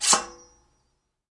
Machete striking hard surface
Recorded with digital recorder
saber, ching